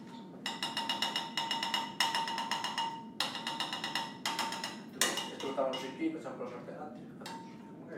FX - golpes de cucharon en la pota 2
kitchen, beat, food